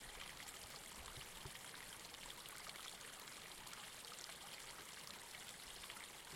Water stream for a river or game environment